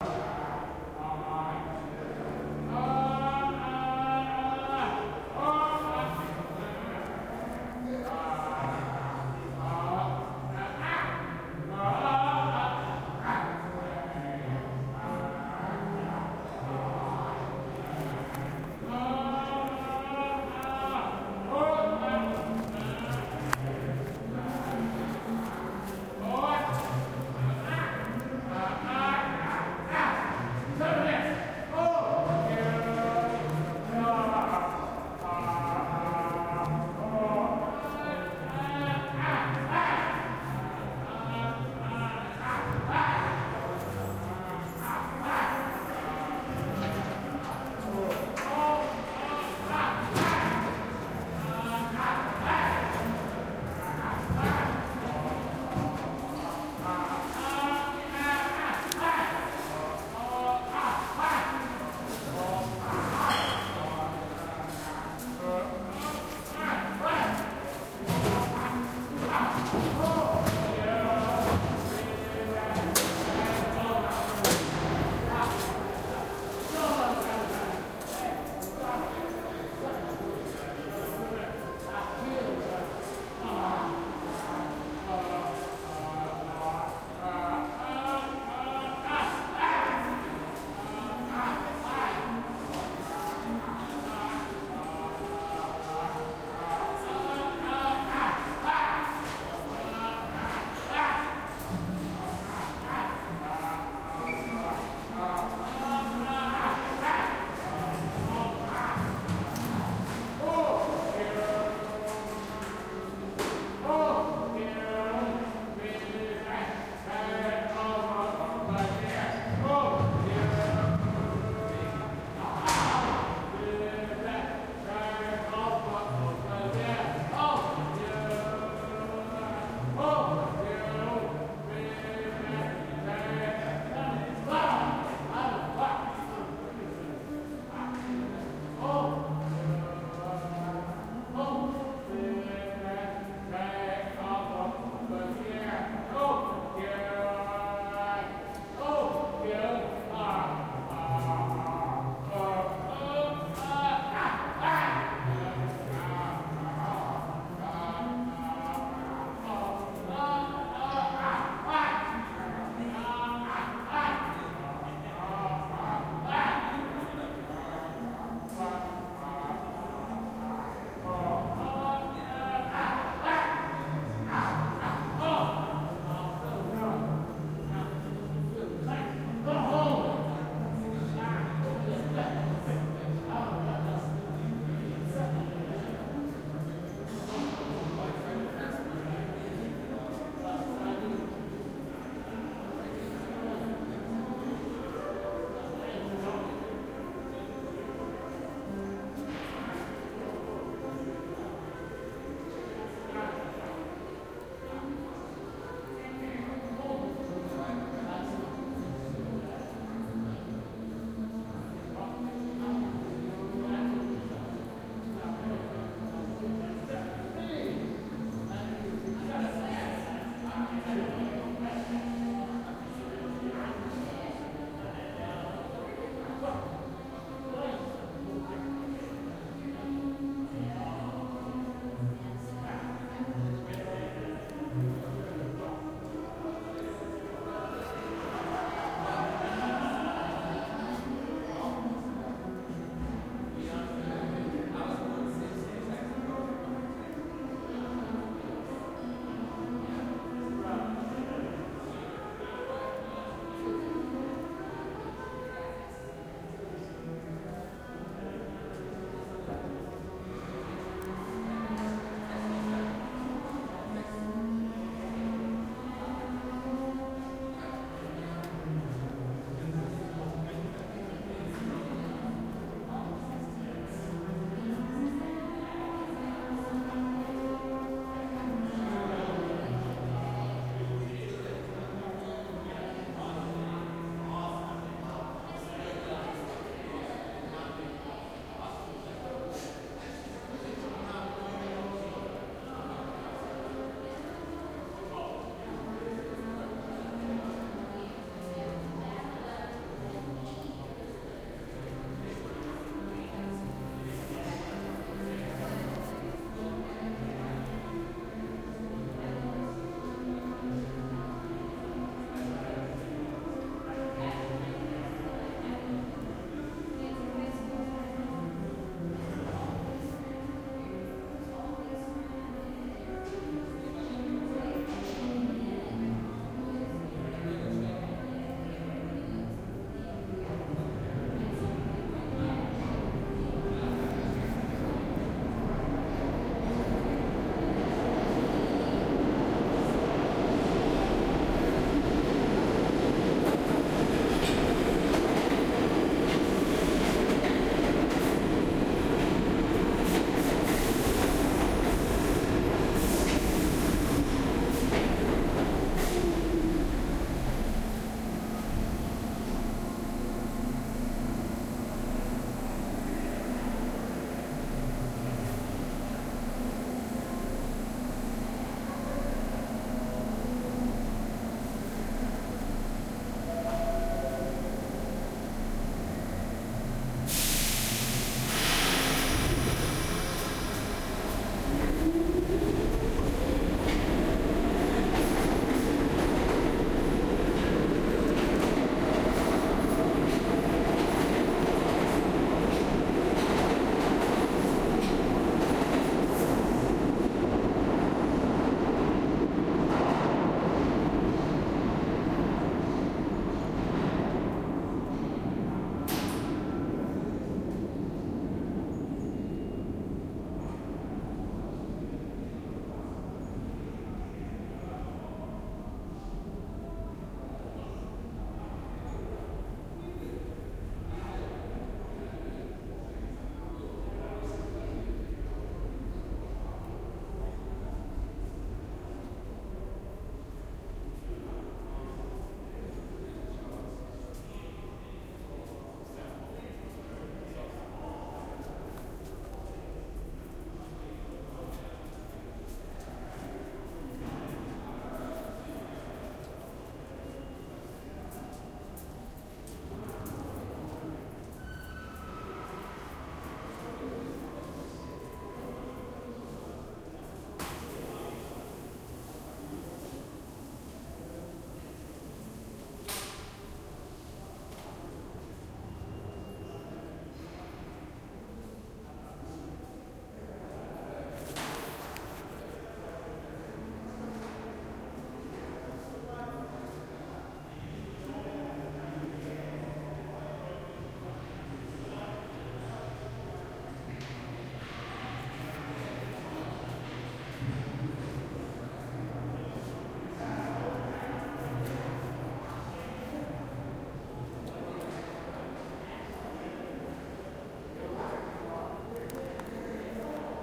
Ranting Guy With Saxophone
Underground subway platform recording. A man is ranting and making guttural noises for the first half. Someone audibly tells him "go home", and the man lets out a final gawp. As he leaves, a street performer with saxophone mockingly plays some Bach.
Recorded with an Edirol R-09.
subway, howling, male, field-recording